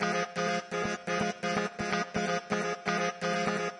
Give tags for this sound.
drone; funeral-dirge; loop; synth

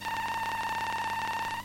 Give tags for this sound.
Mute-Synth-2; Mute-Synth-II; beep; beeps; computer; electronic

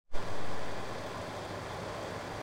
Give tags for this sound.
core-audio; iphone5

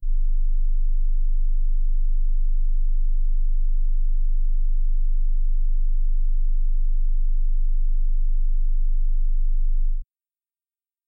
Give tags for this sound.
test; sound; signal; audio

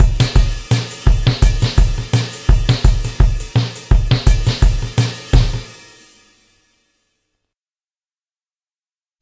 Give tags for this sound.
75bpm; drums; hip-hop; rap

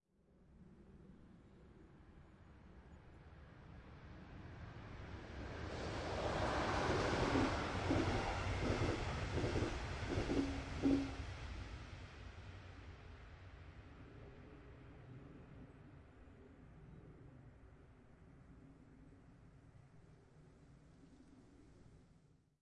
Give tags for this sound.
electric-train; rail-road; field-recording; passenger-train; autumn; city; rail-way

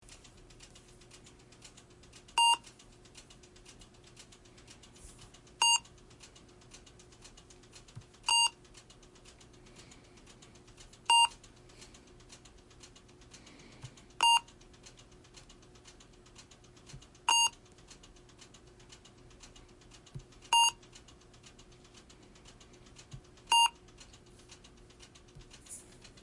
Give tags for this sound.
alarm; atmophere; field; medical; recording

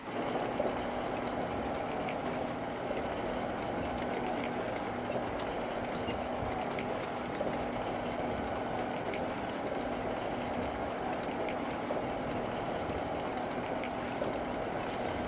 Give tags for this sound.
machine; mechanical; motorized; rolling; squeaky; tank

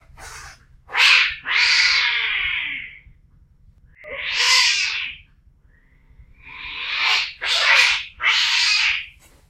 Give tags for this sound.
cat; cat-fight; fury; growl

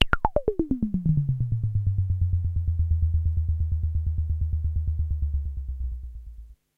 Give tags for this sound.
roland; sample

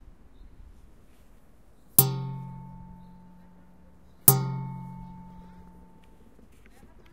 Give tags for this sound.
plate; sheet; trash; tin; bin; garbage

